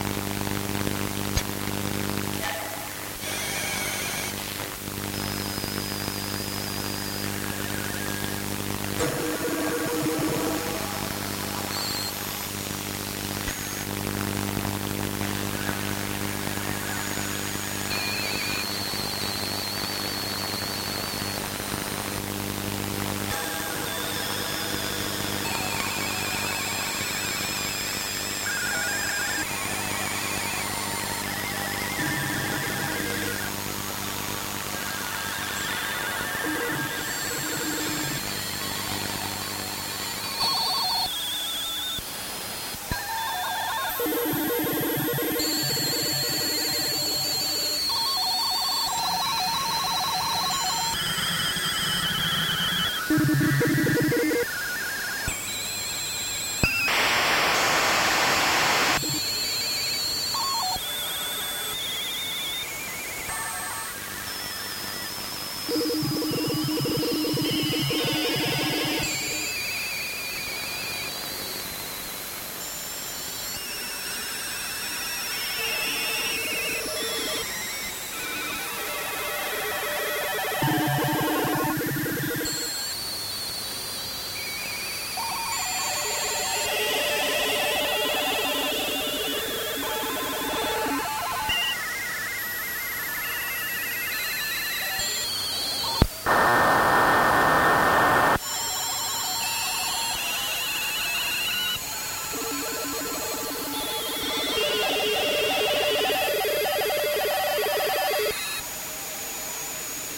Some more morse/fax machine sounds from shortwave 21m band, around 14kHz.
Recorded on 1 Oct 2011.